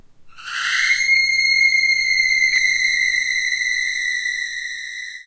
A snippet from one of my squeak toy sounds, paulstretched in Audacity. Sounds like one of those screams heard in horror movies.